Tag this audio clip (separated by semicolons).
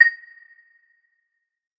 chime; metallic; one-shot; short; synthesised